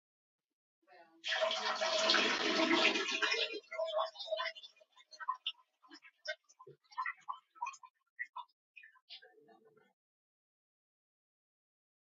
Vocaroo 21 Dec 2022 19 46 31 CST 1iohMmVrw0mW
Just me peeing.